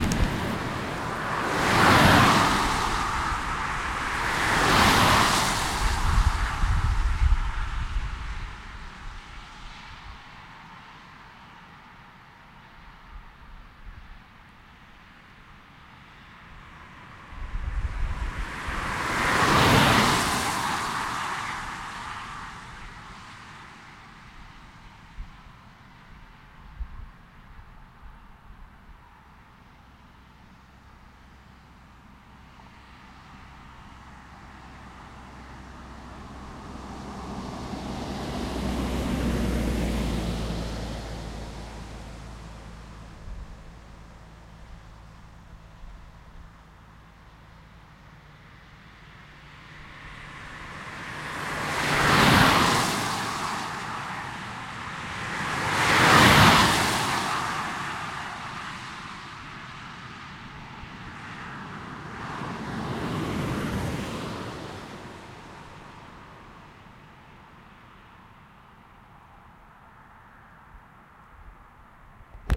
A1 highway traffic 2
Passing Traffic on the A1 highway in Croatia.
Automobils, Bus, Cars, Country, Drive, Motor, Motorway, Pass, Passing, Public, Road, Street, Traffic, Transport